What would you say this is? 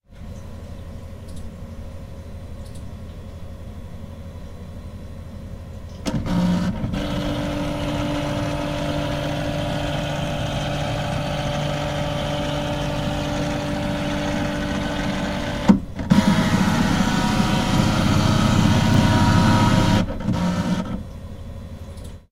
Recording of my HP Scanner. Lots of background noise (its a very quiet scanner)